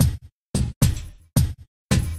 dnb 10 BREAK3 3
Simple Drum and Bass pattern template.
breaks, drum-and-bass, drums